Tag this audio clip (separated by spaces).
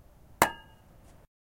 sample-pack drum-kits